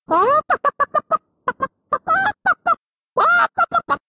chicken sound - clucking
imitation of chicken clucking